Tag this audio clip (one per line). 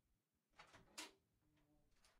steal
doorknob
latch
open
door
heavy